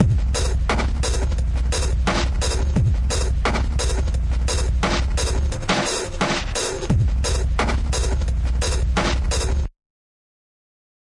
Downsample Beat
A beat made in Logic Pro 9 using Apple Loops. Extremely downsampled with tons of Bit Crusher and other destructive editing. Enjoy!
loop, byte, dnb, bit, electronic, crush, downsample